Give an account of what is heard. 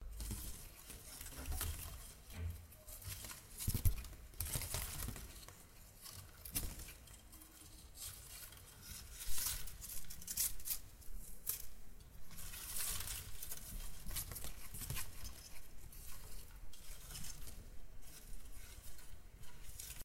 tree palm leaves rustling softly
soft rustle of palm leaves or else, created at home with ZOOM H1
palm, tree, nature